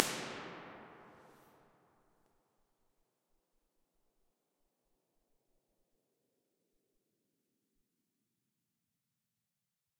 Finnvox Impulses - EMT 2,5 sec
convolution, Finnvox, impulse, ir, response, reverb, studios